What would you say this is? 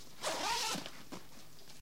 A zipper from a traveling bag version 2
i have 3 versions up